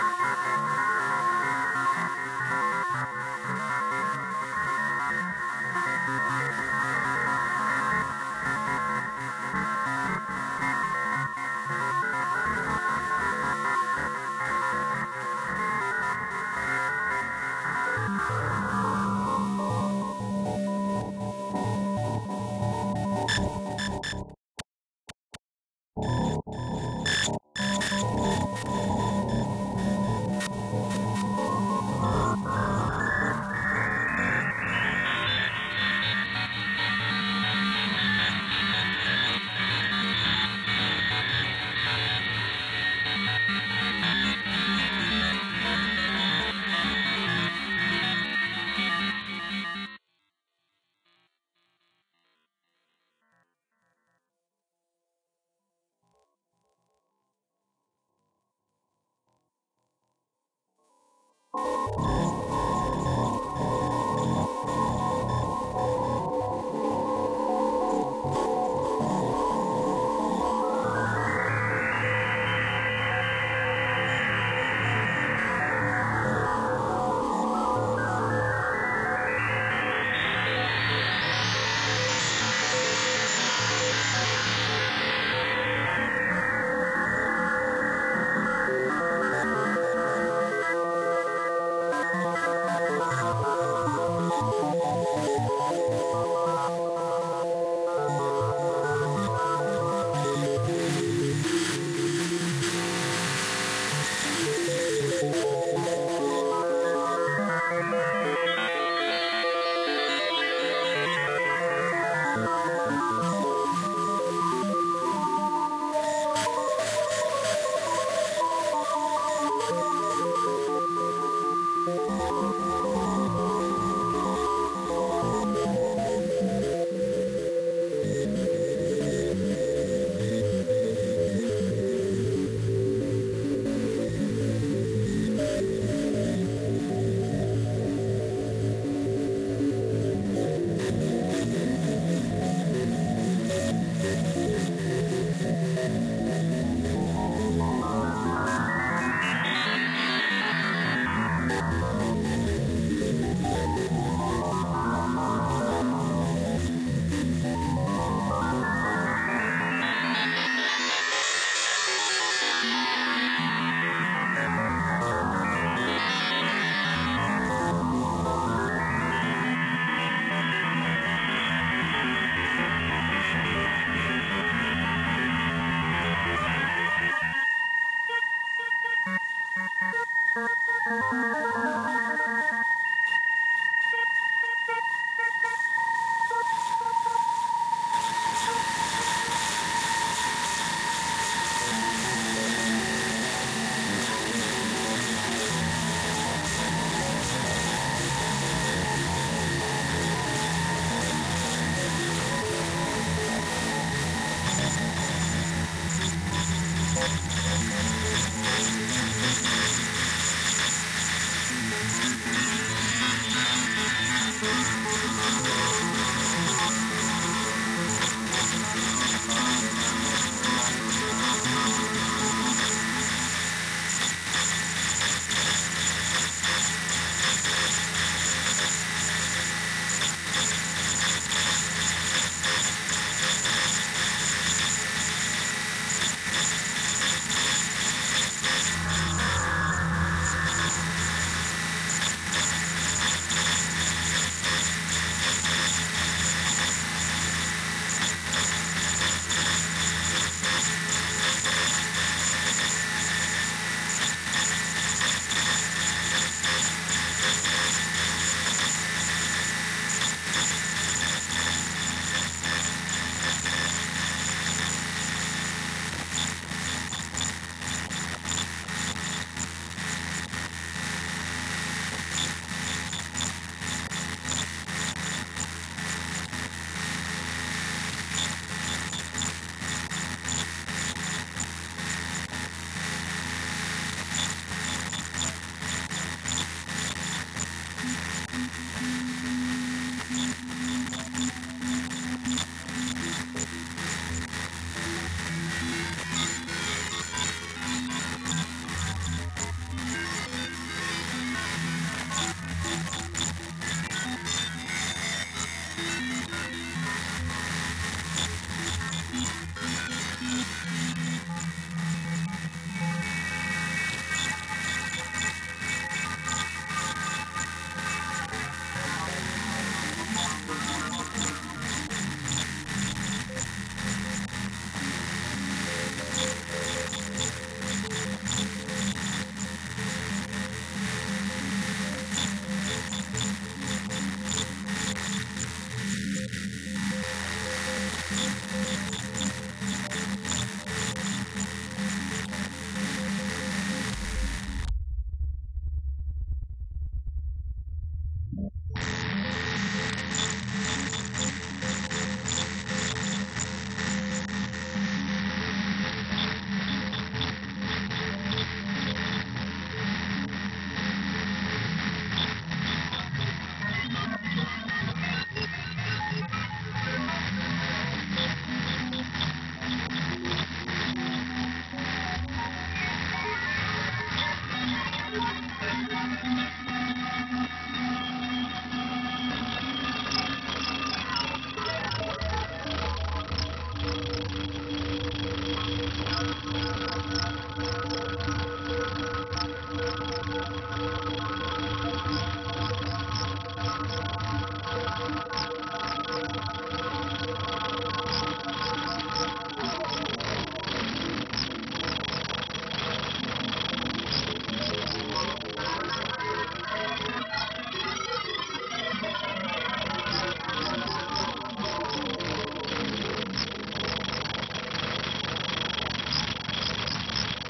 Feedbacking System Sqosc+pitch 16-Jul-2010 1
These sounds have been created in Ableton Live by a 'noise generating' VST which generates noise when fed any audio (or indeed, silence).
The audio signal then feedsback on itself. Sometimes some sort of filter was placed in the feedback loop and used to do filter sweeps.
I control some of the parameters in real-time to produce these sounds.
The results are to a great extent unpredictable, and sometimes you can tell I am fiddling with the parameters, trying to avoid a runaway feedback effect or the production of obnoxious sounds.
Sometimes I have to cut the volume or stop the feedback loop altogether.
On something like this always place a limiter on the master channel... unless you want to blow your speakers (and your ears) !
These sounds were created in Jul 2010.
random, noise, self-generating, patterned-noise, chaotic, feedback, unpredictable, chaos, synthsized, electronic, live-performance